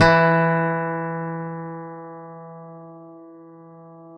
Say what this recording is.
A 1-shot sample taken of a Yamaha Eterna classical acoustic guitar, recorded with a CAD E100 microphone.
Notes for samples in this pack:
Included are both finger-plucked note performances, and fingered fret noise effects. The note performances are from various fret positions across the playing range of the instrument. Each position has 5 velocity layers per note.
Naming conventions for note samples is as follows:
GtrClass-[fret position]f,[string number]s([MIDI note number])~v[velocity number 1-5]
Fret positions with the designation [N#] indicate "negative fret", which are samples of the low E string detuned down in relation to their open standard-tuned (unfretted) note.
The note performance samples contain a crossfade-looped region at the end of each file. Just enable looping, set the sample player's sustain parameter to 0% and use the decay and/or release parameter to fade the
sample out as needed.
Loop regions are as follows:
[200,000-249,999]:
GtrClass-N5f,6s(35)